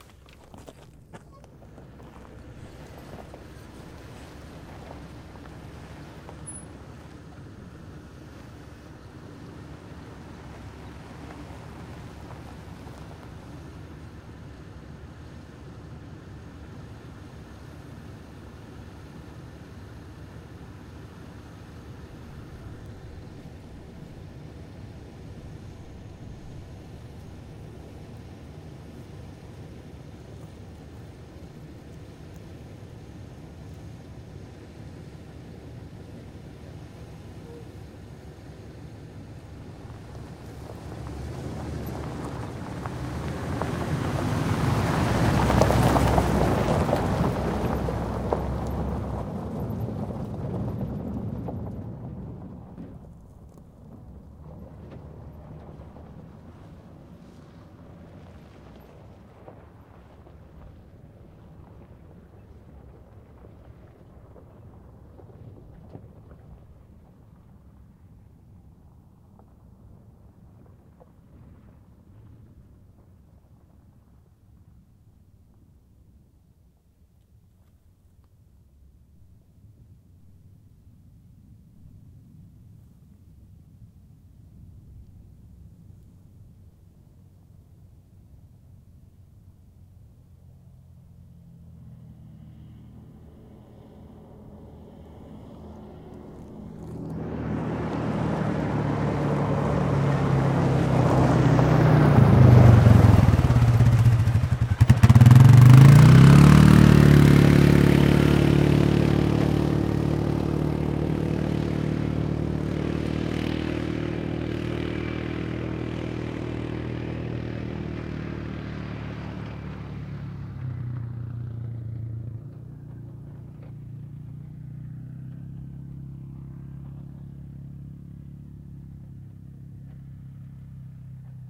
truck pickup idle smoky and drive off far, then pull up long and stop on gravel and atv pass
drive, far, gravel, idle, off, pickup, pull, stop, truck, up